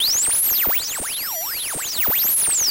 generic sound of a radio tuning
effect, radio, tuning